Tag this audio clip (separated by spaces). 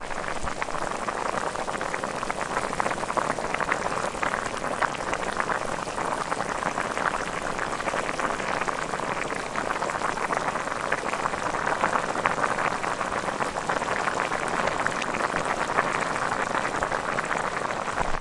kitchen pan food cooking boil boiling-water outdoors brazier boiling pilaf bubbling cauldron fire water kettle hot saucepan